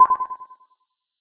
A beeping SFX of a radio wave.
Beep, Wave